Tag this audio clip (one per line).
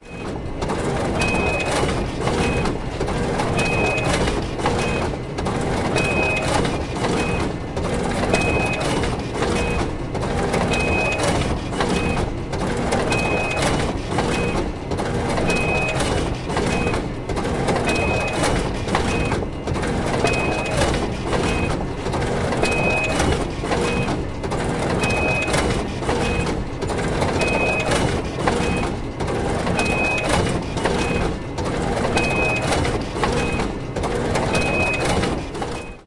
copy-machine
field-recording
industrial
copier
printer
machine